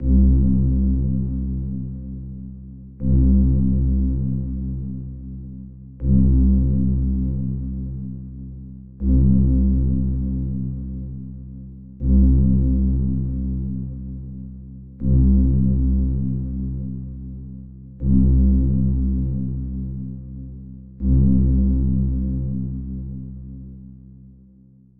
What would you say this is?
Deepbassloop80bpm
Deep layered bass, with a hint of retro and spaced vibe, 80 BPM
Bass, Loop, Pad, Retro, Space, Synth